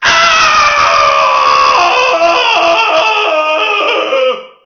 Male Scream
agony, dramatic, funny, male, pain, scream, screaming, shout, wilhelm, yell